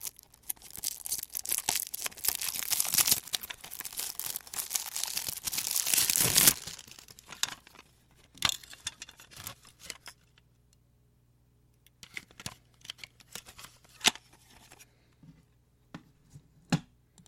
cassette open
Unwrapping a shrink wrapped cassette.
packaging, cellophane, unwrapping, plastic, crinkle, cassette